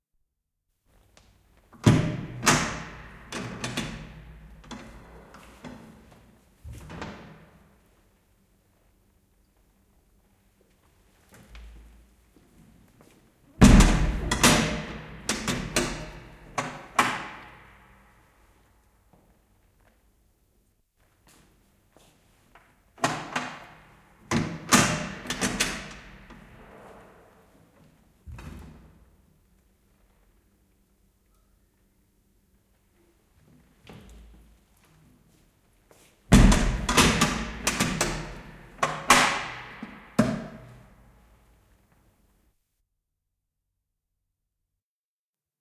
Police station's cell door // Poliisiaseman sellin ovi
Cell door and lock open and close a couple of times. Recorded from corridor.
Lukko ja ovi auki ja kiinni pari kertaa. Äänitetty käytävältä.
Place/ paikka: Finland / Suomi / Vihti
Date/aika: 30.09.1982